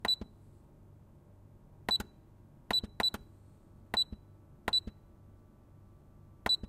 Pressing coffee machine buttons and they peep. This is DeLonghi coffee machine.
XY-Stereo.